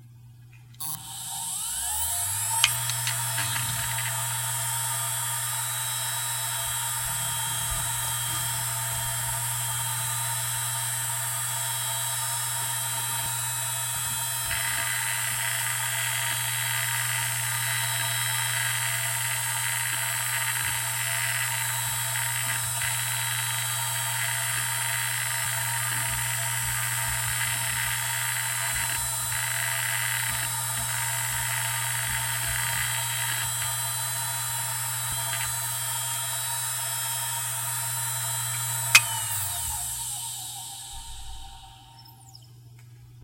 A WD hard drive manufactured in 2003 close up; spin up, writing, spin down.
This drive has 1 platter.
(wd caviar wd800bb)